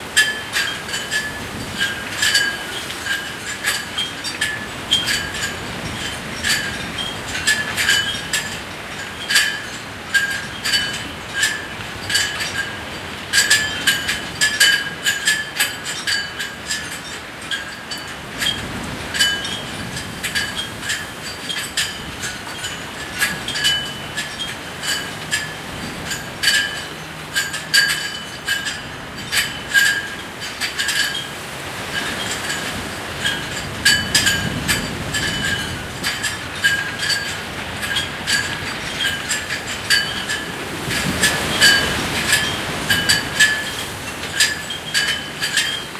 Abandoned Greenhouse by the Sea
Came across the skeleton of an old metal framed greenhouse on a walk. Wind was strong and making the dangling chains and collapsed metal bars swing around and sound like windchimes.
Recorded with a Tascam DR-40 portable recorder. Processing: Light use of both EQ and and L3 multi-band limiting.
Ambience, Greenhouse, Guernsey, Sea, Vinery, Wind, Windchimes